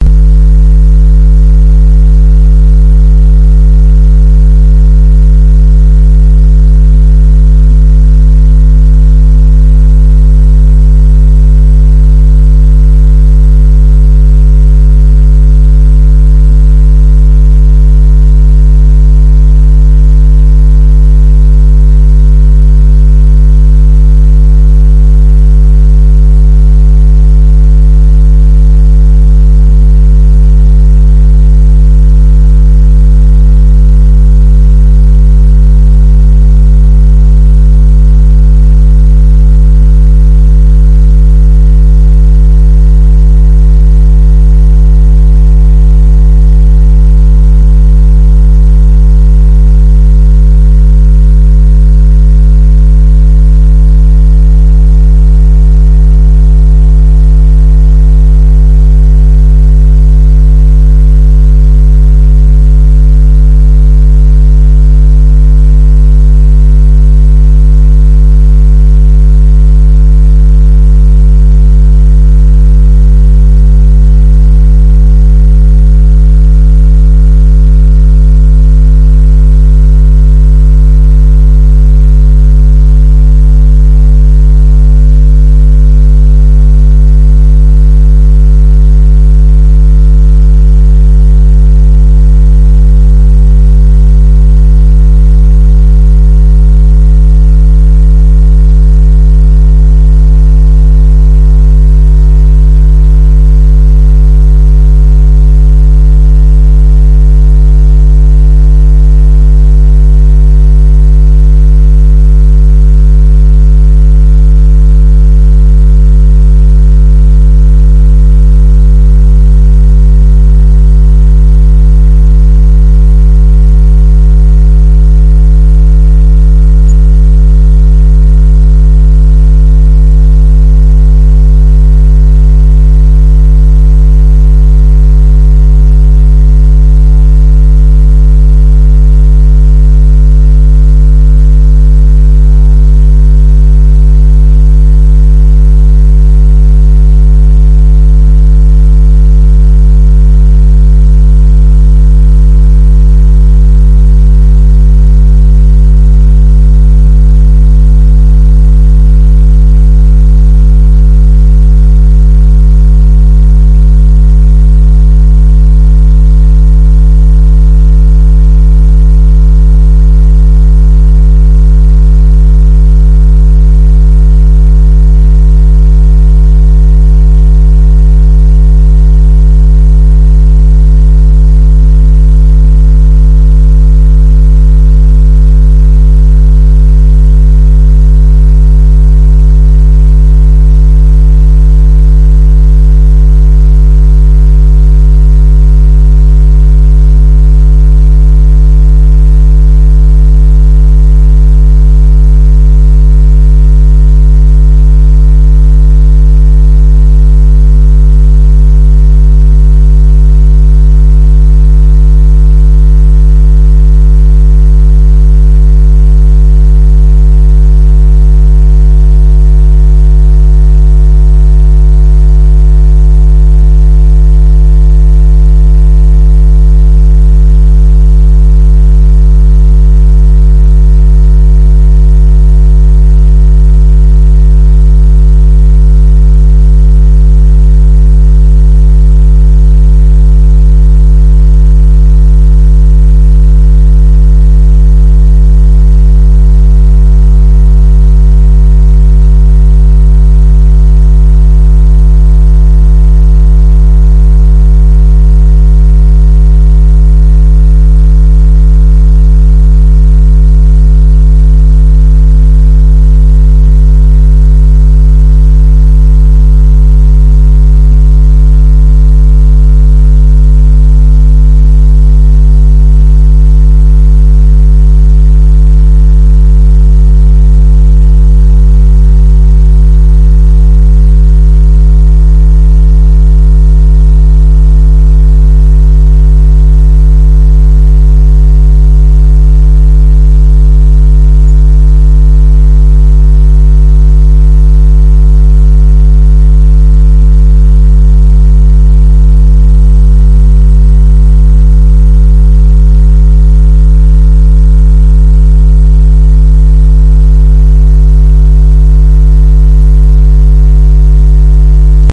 ECU-(A-XX)84+
Engine Control Unit ECU ATV Trail Running Type Rheology Shelf Differential Calculator High Power Rate Energy Battery Atmospheric Water Flexfuel Ecology Sport Nature Cross Field Forest Security Autocom Radio Wave
Atmospheric, ATV, Autocom, Battery, Calculator, Control, Cross, Differential, Ecology, ECU, Energy, Engine, Flexfuel, Forest, High, Nature, Power, Radio, Rate, Rheology, Running, Security, Shelf, Sport, Trail, Type, Unit, Water, Wave